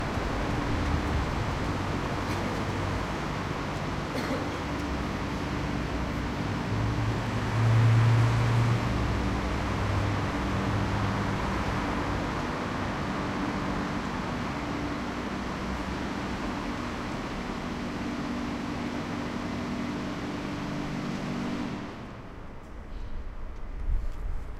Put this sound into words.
Next to Shell Gas Station Müllerstraße Berlin Germany
Standing next to a gas station in Berlin. The humming is probably from the car washing system.
Recorded with a Zoom H2.
night, station, infrastructure, cars, industrial, gas, urban, transportation, berlin, noise, car